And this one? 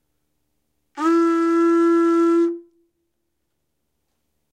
A medieval battle horn.
Sci-Fi, Fantasy, Medieval, Battle, Horn